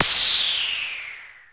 Exotic Electronic Percussion49